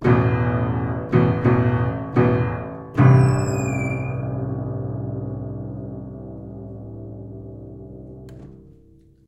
Playing hard on the lower registers of an upright piano. Mics were about two feet away. Variations.